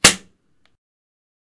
Sound of crossbow firing/shooting made by recording a mouse trap. Originally recorded for a University project, but thought they could be of some use to someone.